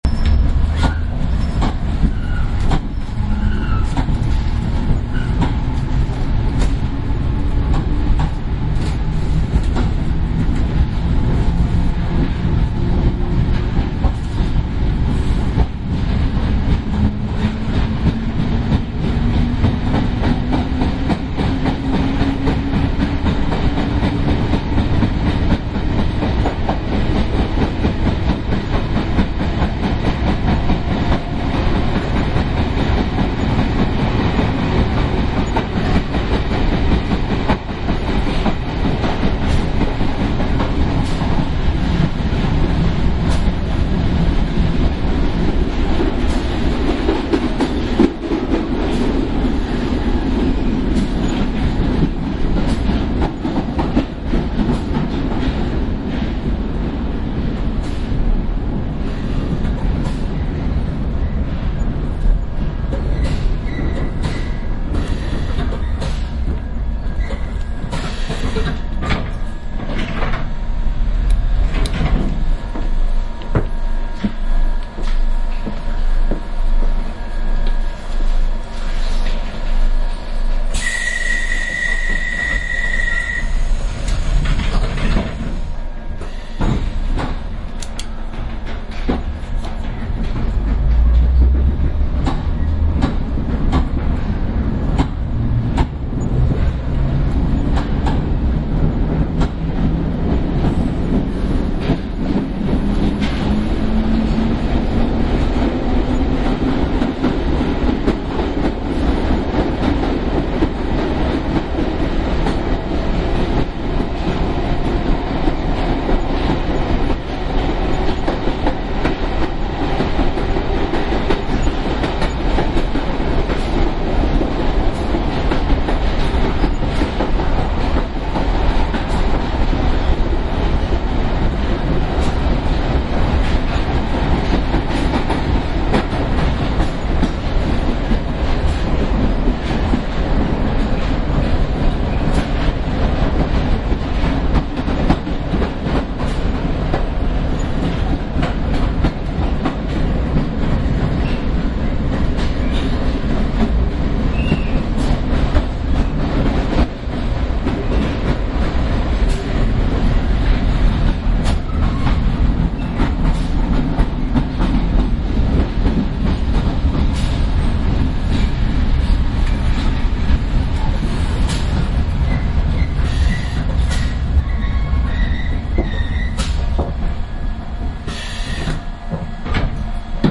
Metropolitan Line Ambience
binaural, city, field-recording, london, london-underground, metro, station, train, tube, underground